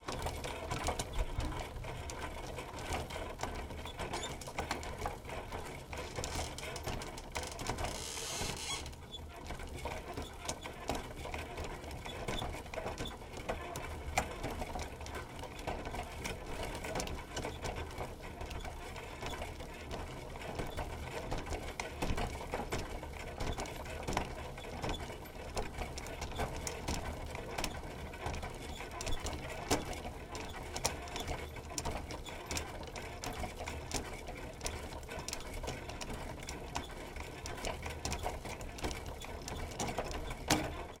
FXSaSc Kettler Kettcar 07 Chain close Rattle Squeek